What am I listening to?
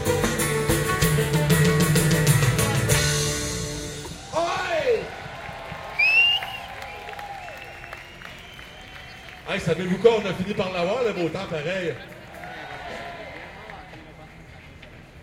Latest seconds of music live performance in Montreal, hand clapping, a guy says something in French to the audience. Soundman OKM binaurals, Fel preamp, Edirol R09